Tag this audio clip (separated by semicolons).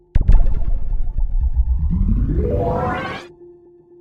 bwah; pop; design; alien; laser